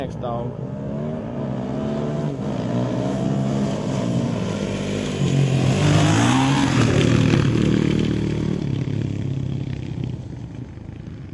motorcycle dirt bike motocross pass by medium speed and jump dirt ramp2
motorcycle dirt bike motocross pass by medium speed and jump dirt ramp
medium, ramp, bike, motorcycle, motocross, pass, jump, dirt